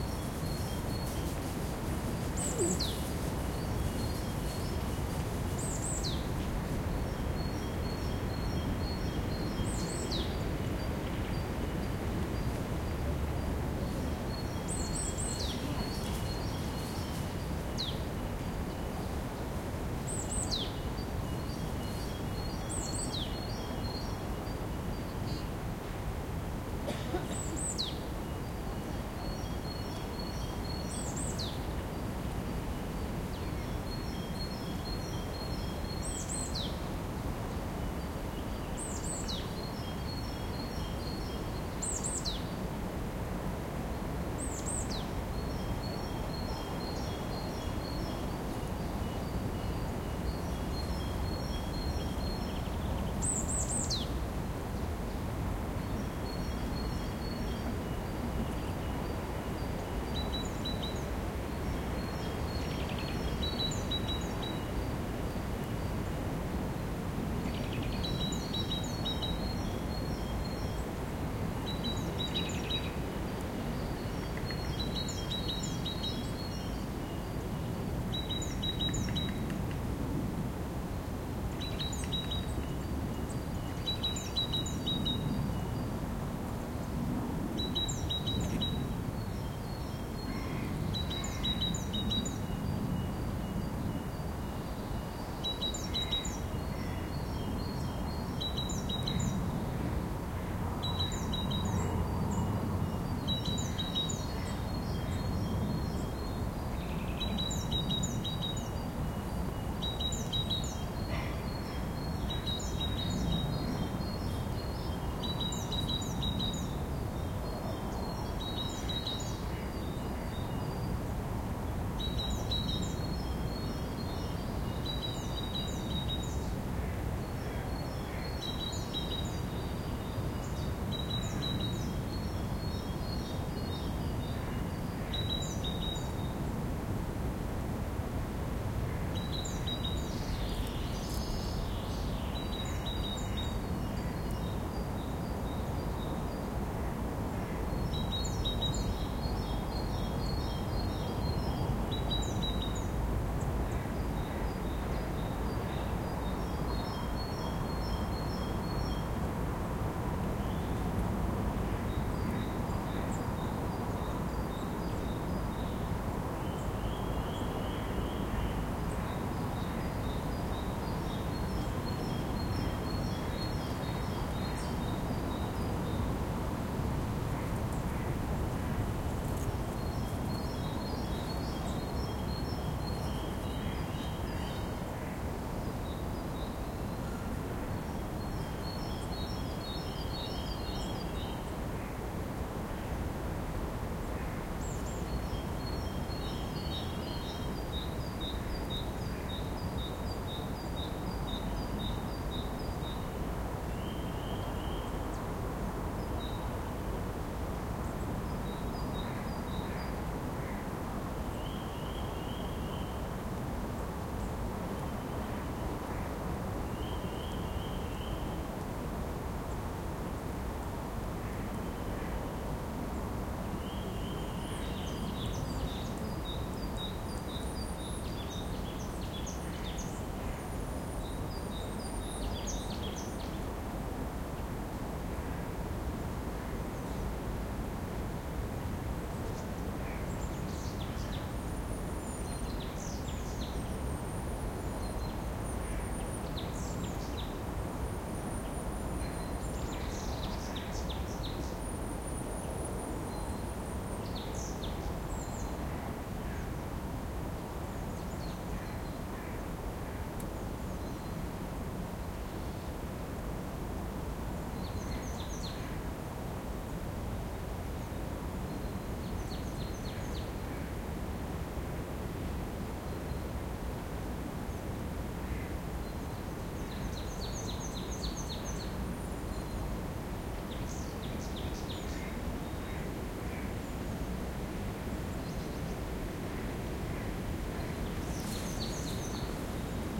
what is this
The sound of spring in the forest in Bratislava.
Recorded ZOOM H2n 27.02.2016